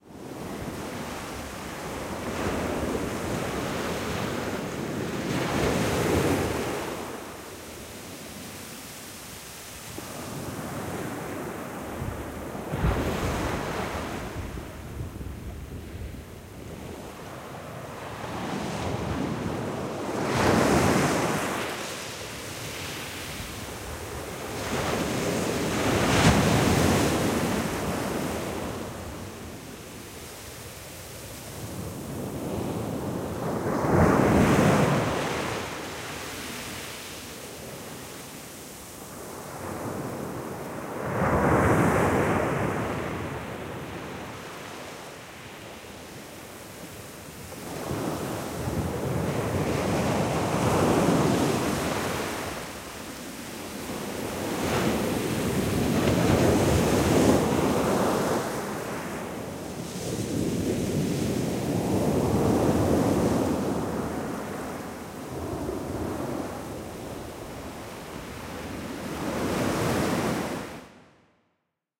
Field recording of waves breaking on Felixstowe Beach in Suffolk, England. Recorded using a mono shotgun mic, external pre-amp and Zoom H4. Recorded close to the water to try and capture the spray from the waves. Wind shield was used but a little bit of wind exists on the recording with a HPF used to minimise rumble. A little bit of stereo reverb has been added to give a bit of width to the mono recording.